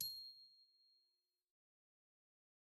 One-shot from Versilian Studios Chamber Orchestra 2: Community Edition sampling project.
Instrument family: Percussion
Instrument: Glockenspiel
Note: C7
Midi note: 96
Midi velocity (center): 63
Room type: Band Rehearsal Room
Microphone: 2x SM-57 spaced pair, 1x AKG Pro 37 Overhead
c7, glockenspiel, midi-note-96, midi-velocity-63, multisample, percussion, single-note, vsco-2